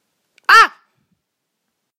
Pain scream girl
666moviescreams, scream, agony, pain, girl, woman